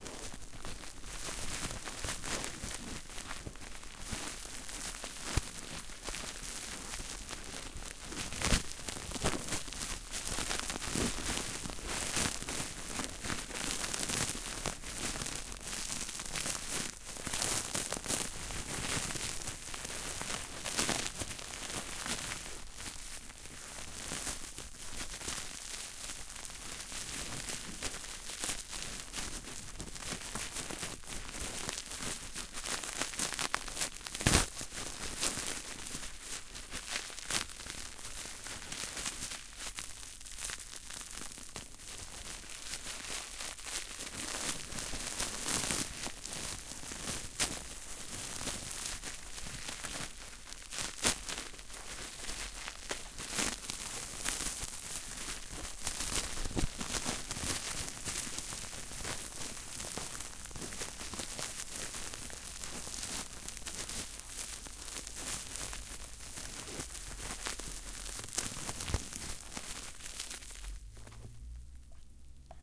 Bubble Wrap Crinkle Close
Ambient crinkling bubble wrap, close to the mic. Stereo Tascam DR-05